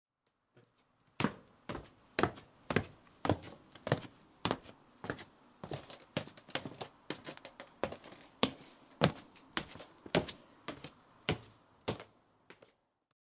09-Man walking stairs
Man walking on wooden stairs